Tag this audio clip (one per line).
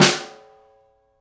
bathroom
bright
drum
echo
lively
reverb
sd
shower
snare
snaredrum